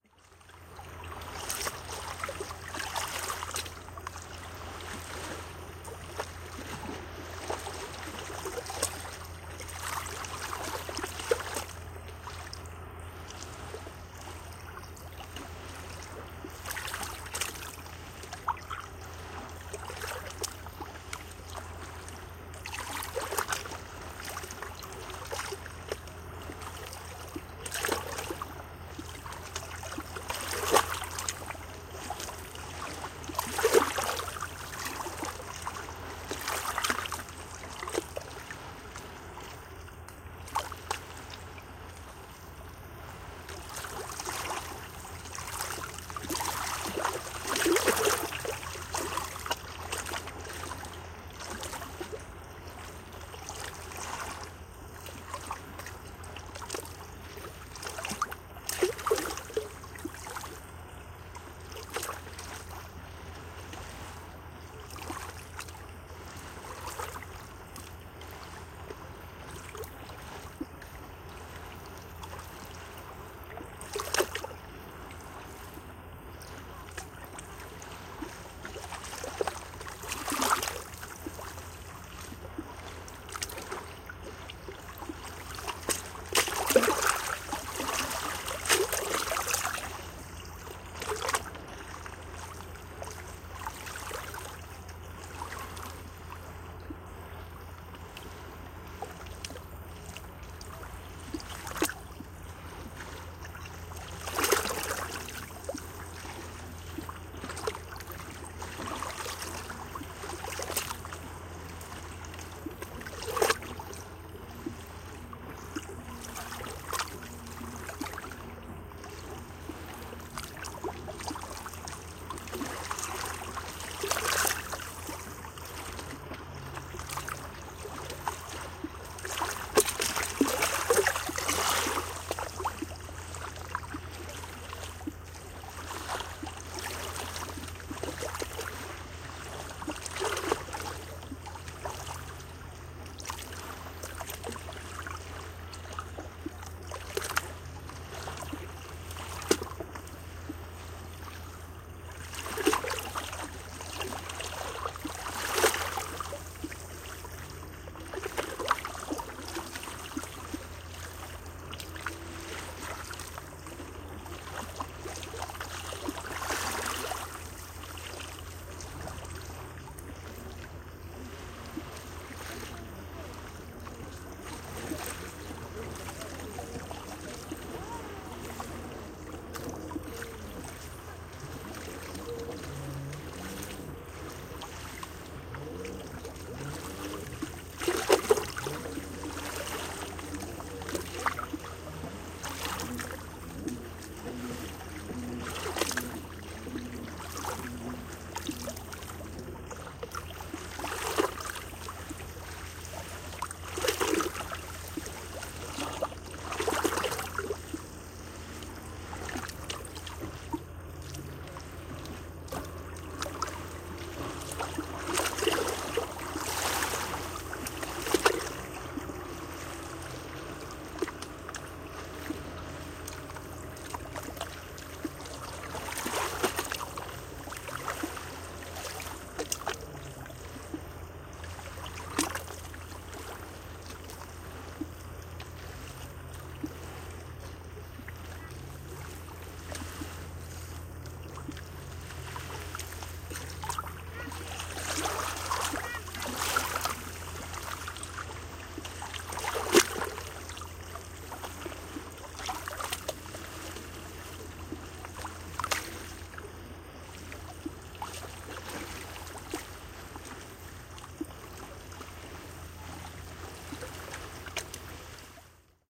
seashore tunisia - stone light
beach, seashore, tunisia